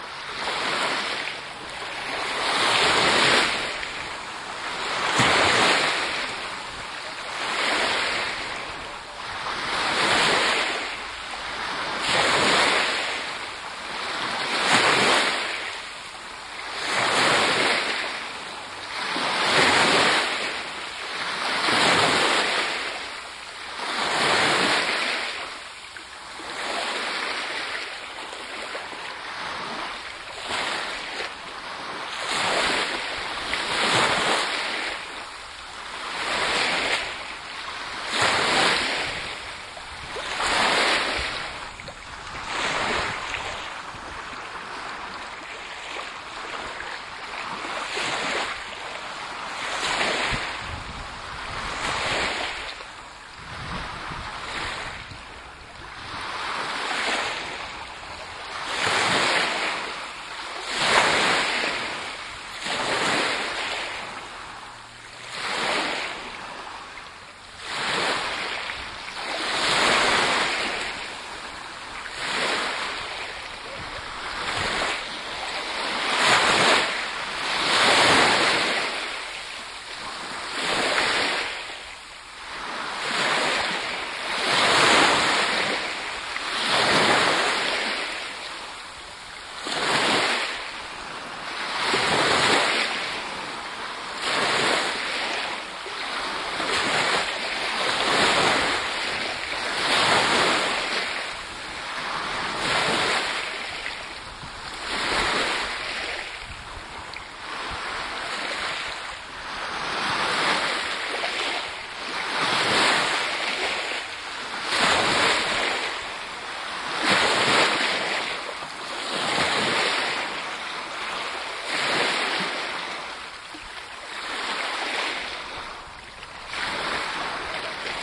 Waves at Forth
Standing at the Firth of Forth near Edinburgh is like being
at the seaside. It was a sunny Friday in July 2006, when I did this
recording at one of my favourite spots there, this time using the Sony HiMD MiniDisc Recorder MZ-NH 1 in the PCM mode and the Soundman OKM II with the A 3 Adapter. Ideal place for a picnic!
binaural, field-recording, oceansurf, scotland, waves